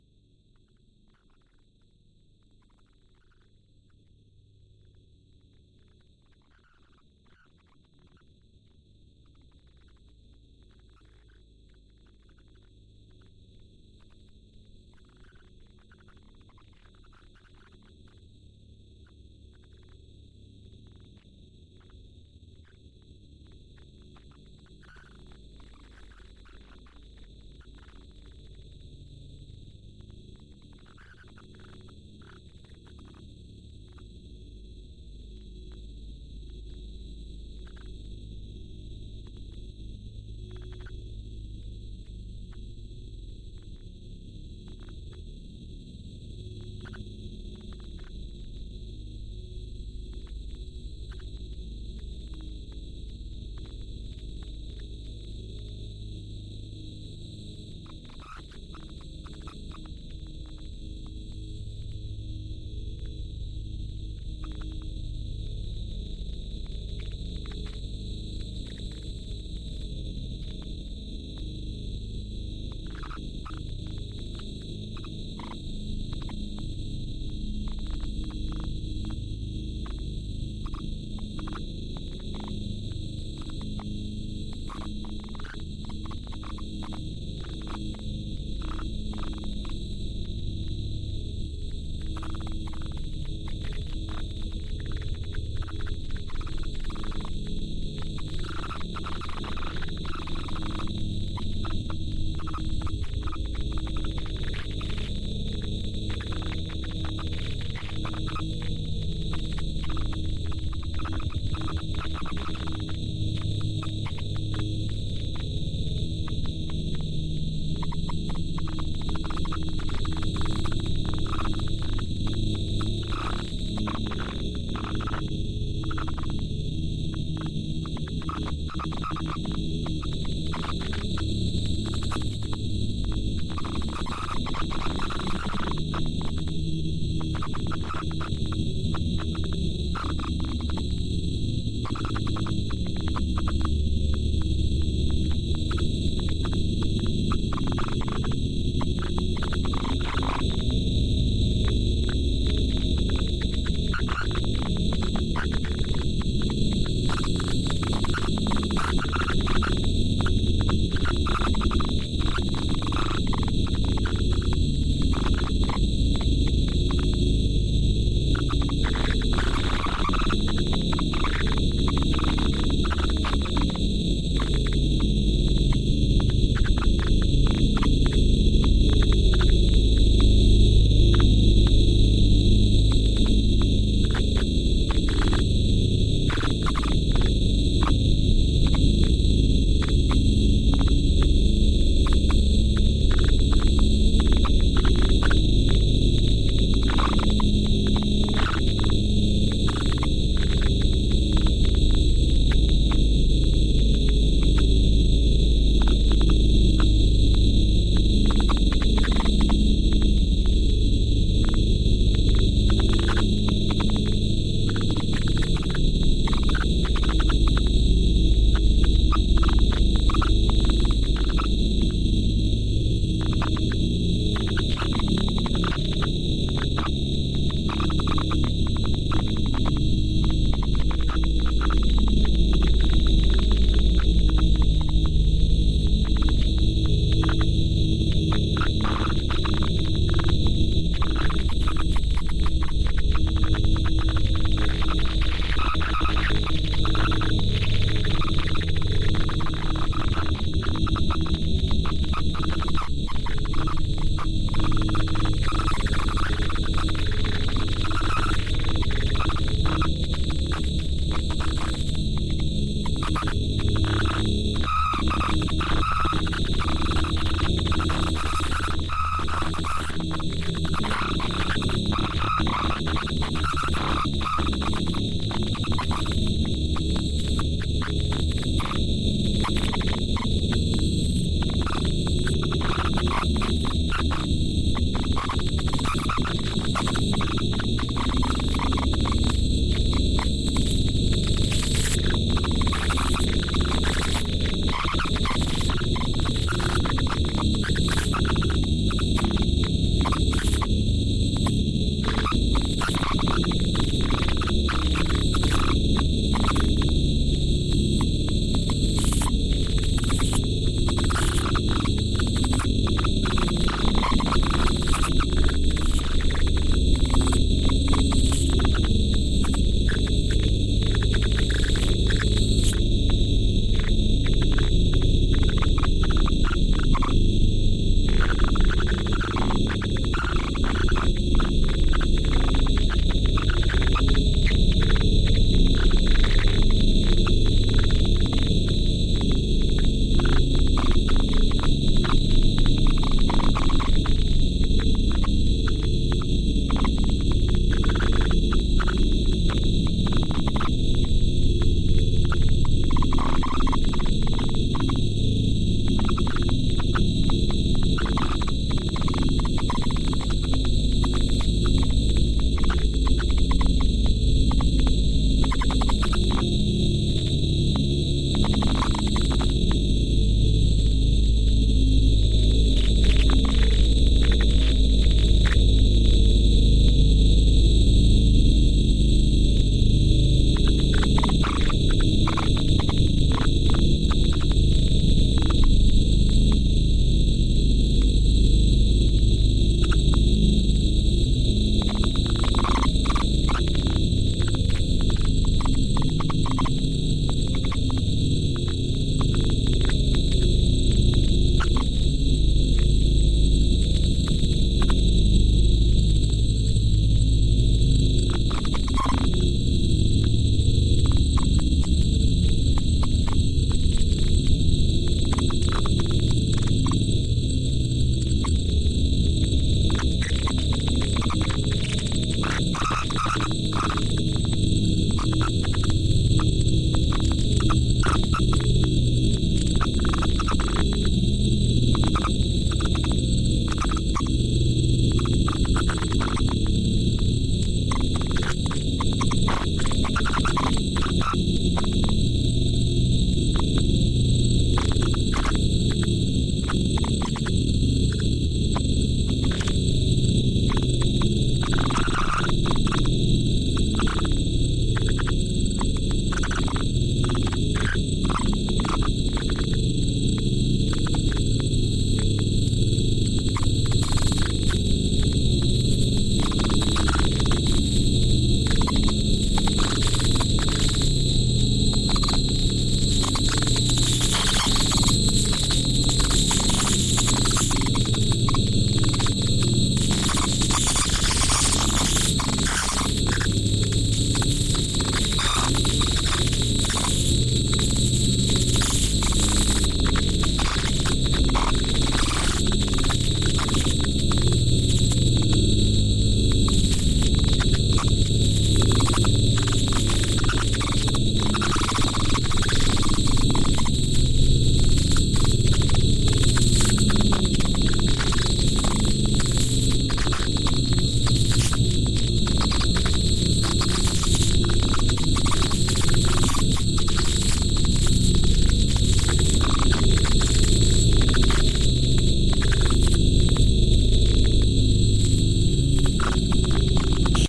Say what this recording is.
Sounds that I recorded from machines such as tyre alignments, hydraulic presses, drill presses, air compressors etc. I then processed them in ProTools with time-compression-expansion, reverberation, delays & other flavours. I think I was really into David Lynch films in 2007 when I made these...
field, processed, recording